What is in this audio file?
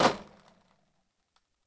Beercrate being moved
beer, bottle, crate, glass